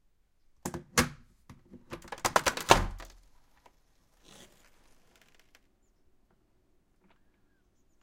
frozen window opening
Opening a frozen roof window covered with ice. After opening there is possible to hear noise from outside (birds and hum) and also some noises caused by holding a recorder. Recorded with Sony PCM-D50, built in mics.
ice, rattle, roof-window